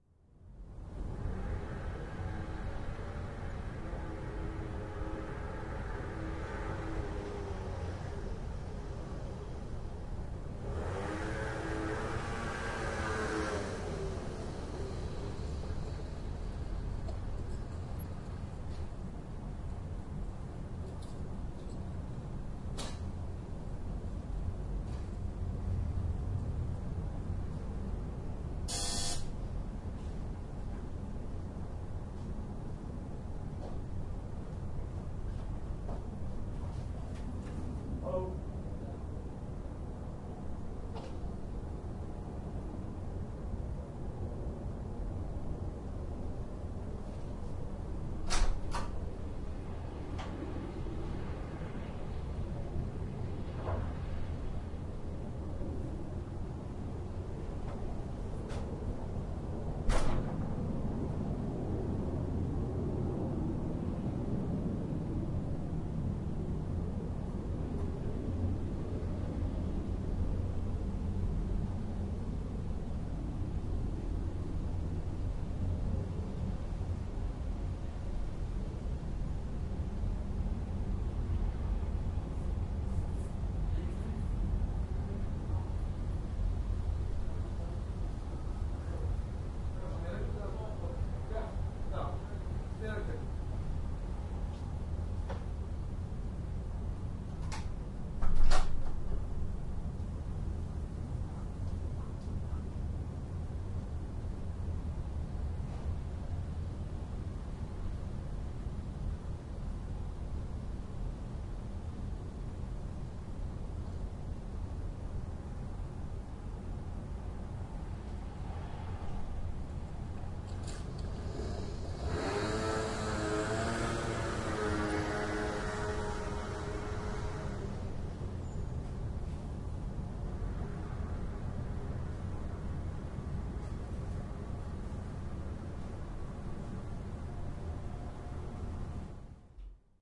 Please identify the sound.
As a background you hear some urban noise and the pumping station located just behind the apartment-building that I live in. There they keep the pressure on our drinking-water. A scooter approaches and holds still in front of the building. It's the pizza-boy and he's going to deliver my Lasagna. I ordered it because I was hungry but also because then I knew that this typical sound would appear and there would be enough time to get my Edirol-R09 in it's right position to record this sound. You hear that most irritating doorbell of mine. I open the door and wait till the pizza-boy has reached my door. He hands me the Lasagna, me him the cash. I get back into my apartment, closing the door, meanwhile he's leaving on his scooter, fading away in the distance
engine, field-recording, household, street, street-noise, traffic